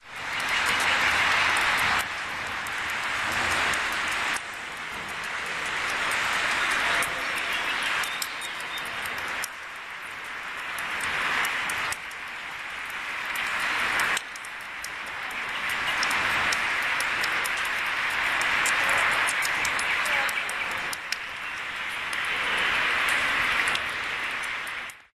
under bridge2 300510
30.05.2010: 21.00. Under the Przemysl I bridge in the city of Poznan where I was watching overfilled the Warta river because of the main flood wave. The sound reverbed a little bit.
There are audible: bird song, passing by cars, church belles, dripping, people steps (a hundreds of people are watching the flood in these days)- I calls this phenomenon - the flood tourism.
more on:
bridge, cars, birds-singing, poland, water, poznan, dripping